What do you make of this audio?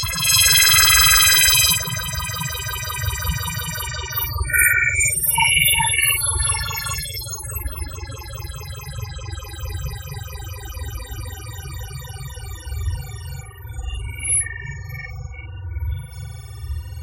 science fiction noise